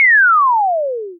Retro, Drop 02
Retro, supply drop and/or bomb drop! (An object falling in general!).
This sound can for example be used in games - you name it!
If you enjoyed the sound, please STAR, COMMENT, SPREAD THE WORD!🗣 It really helps!
/MATRIXXX
ammo, attack, bomb, box, cartoon, classic, crate, drop, fall, falling, game, retro, supply